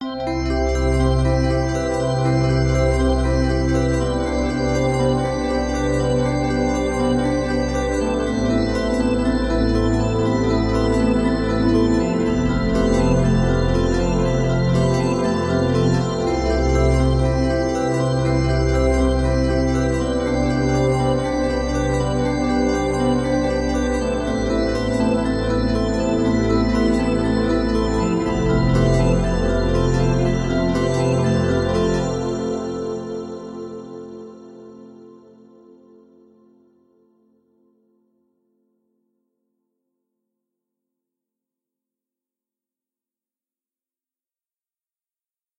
Calm Synthesizer, A
The result of my first quick experimentation with the 'Massive' synthesizer - a cheap and very cliche little loop. I will never have a use for it, so perhaps someone else will (*cough* ringtone *cough*). I have left the ending reverberation in so you can loop it easily in Audacity without clipping or strange reverb silencing. It is at roughly 120BPM and the progression is as follows (in case you wish to add more on top of it):
C major + added 7th (CEGB)
G major + sus. 4th (GBDC)
A minor + sus. 9th (ACEB)
F major + sus. 2nd + sharp 4th (FACGB)
An example of how you might credit is by putting this in the description/credits:
Originally created on 31st May 2016 using the "Massive" synthesizer and Cubase.
120, BPM, calm, electronic, loop, major, pad, peace, peaceful, synth, synthesiser, synthesizer